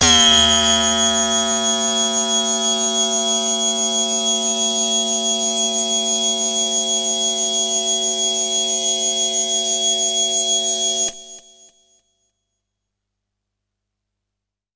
Leading Dirtyness - G#7
This is a sample from my Q Rack hardware synth. It is part of the "Q multi 009: Leading Dirtyness" sample pack. The sound is on the key in the name of the file. A hard, harsh lead sound.
hard, harsh, lead, multi-sample, waldorf